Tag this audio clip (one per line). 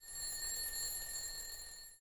Call
Phone
Telephone